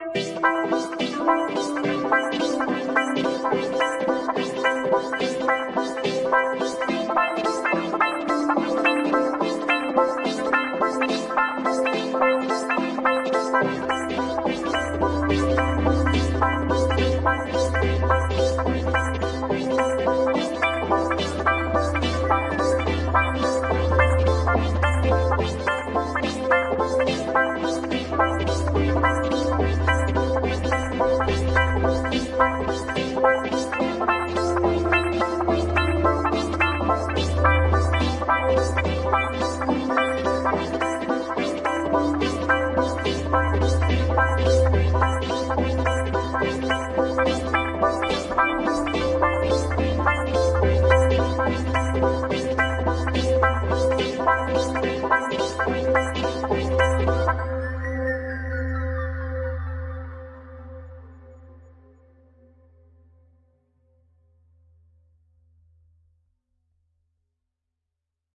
Joyful music fragment, with a twist.
Made with Nlog PolySynth and B-step sequencer, recorded with Audio HiJack, edited with WavePad, all on a Mac Pro.